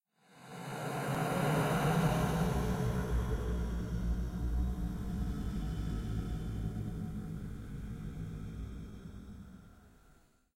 A horse vocalization edited using paulstretch and playing with tempo.
beast, chost, creature, ghoul, groan, growl, Halloween, haunted, horror, moan, monster, roar, scary, zombie